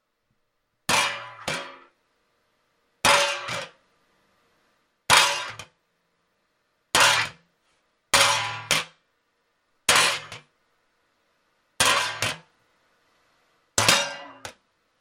Dropped Metal Sheet
Metal sheet hitting floor and bouncing a little. Actually used a cookie sheet and hit it with skillet.